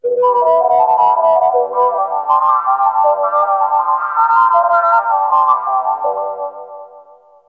This is the Nord Lead 2, It's my new baby synth, other than the Micron this thing Spits out mad B.O.C. and Cex like strings and tones, these are some MIDI rythms made in FL 8 Beta.